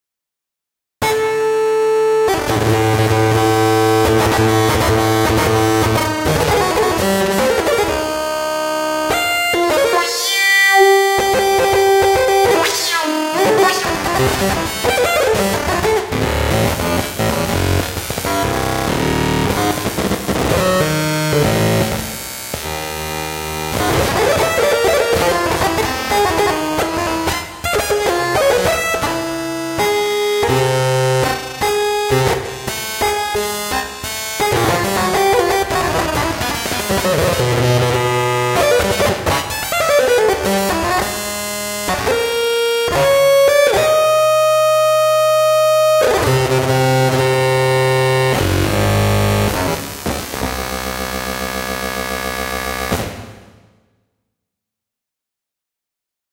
Emulation of a distorted electric guitar, in full glitch mode, generated in Zebra. Mercifully, it's only 56 seconds long.